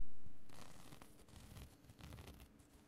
Scratching a plastered wall
scratch, scratches, scratching, wall